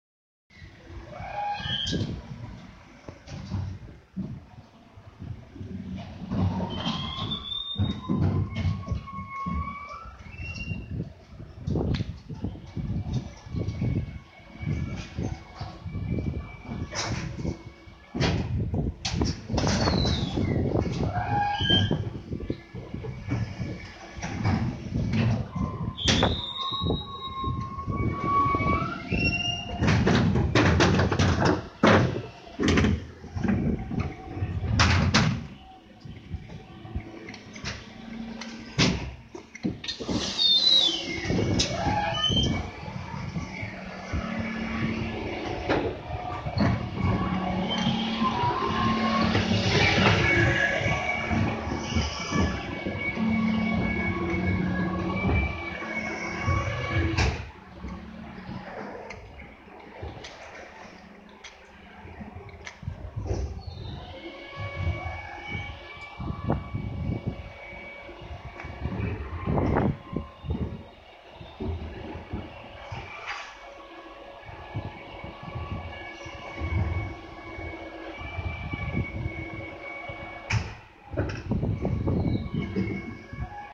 Sounds when travelling on Hayling Ferry, including raising the ramps. Some wind sounds (unfortunately). Recorded on a Wileyfox Storm.